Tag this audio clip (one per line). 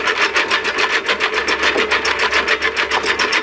hand-mower
handmower
lawn
mower